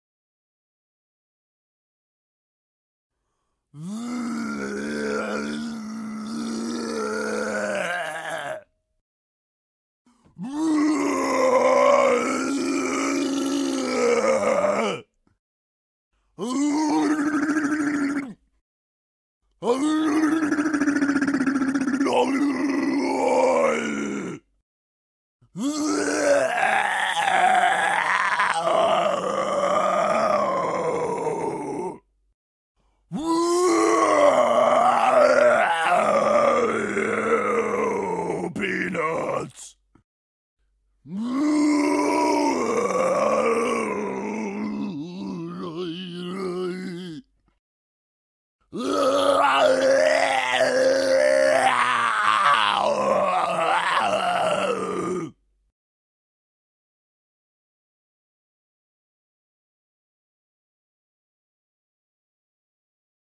Misc Zombie
creepy, ghost, horror, monster, scary, spooky, undead, yelling, zombie